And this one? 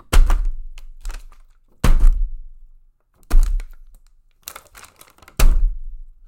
This is dropping a water bottle with water in it next to the mic. I love how much bass is picked up. I've used this sound for quite a bit.